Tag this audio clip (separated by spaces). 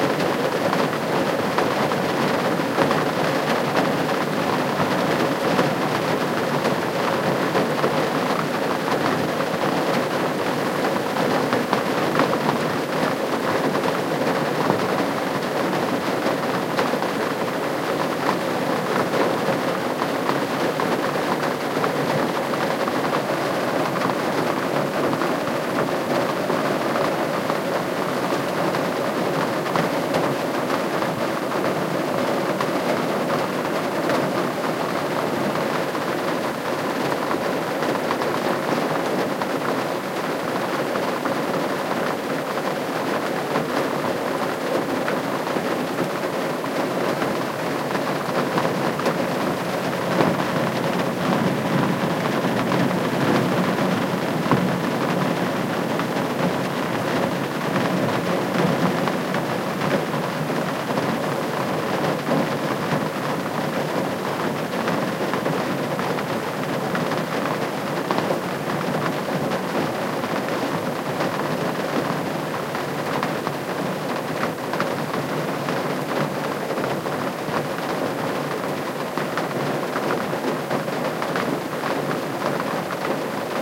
car drop drops rain raindrops roof water